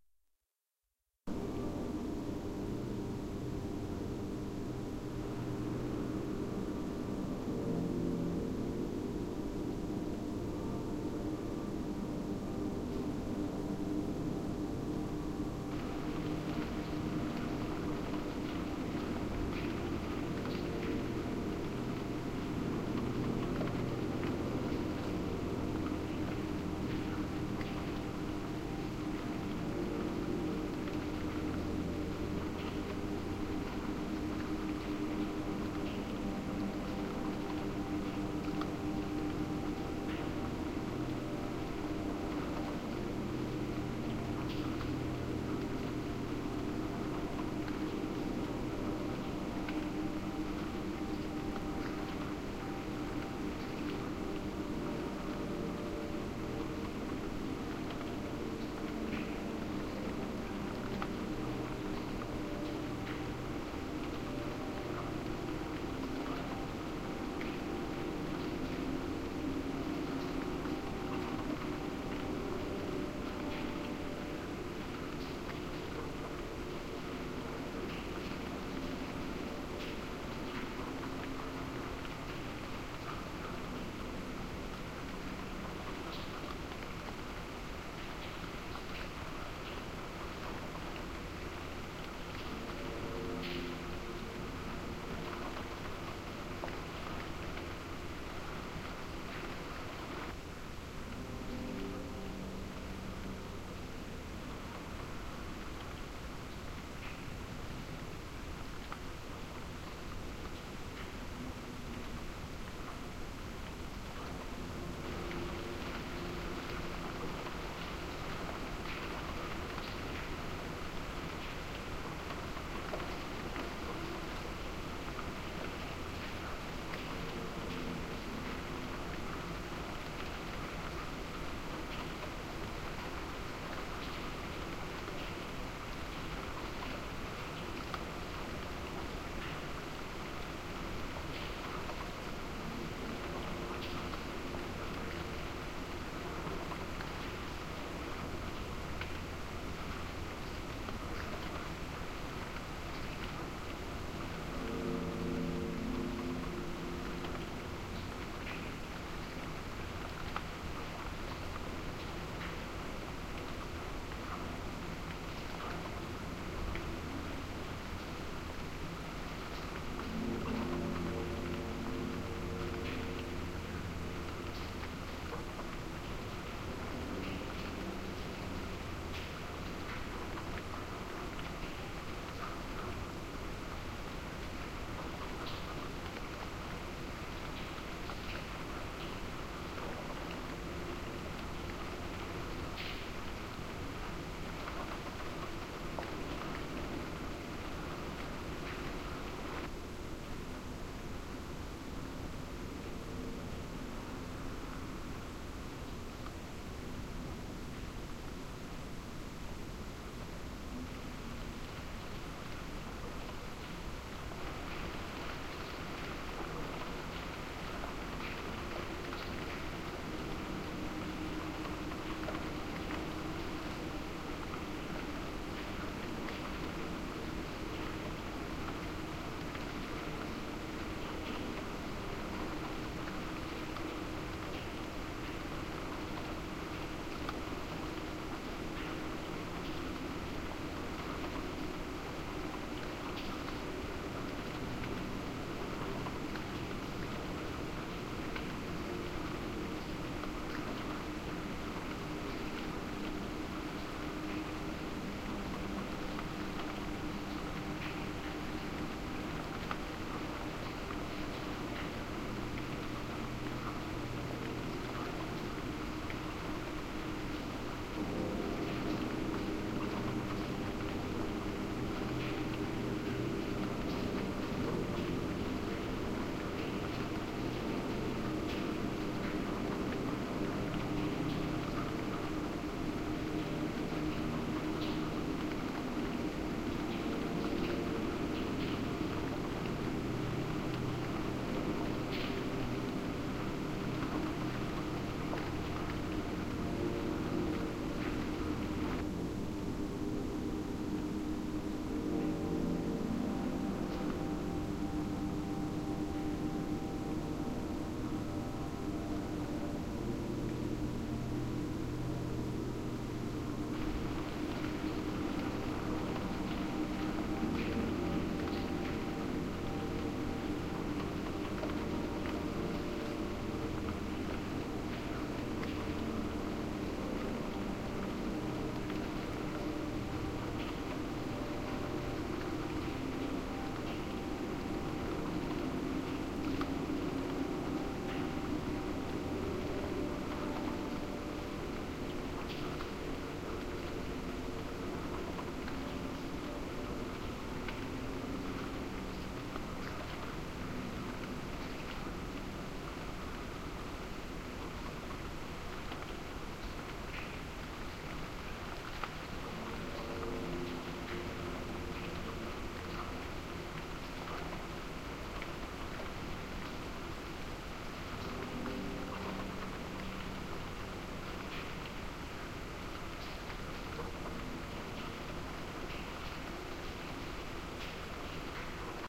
belfry theme
processed recording of belfry bells, combined with environmental sounds in and around my home.